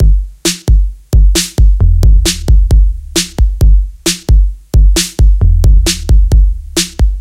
Electro136KickAndSnare
136bpm 2 bars of basic electro beat with kick, quiet drone and a sharp 808 snappy snare.
808, beat, drum, Electro, kick, snare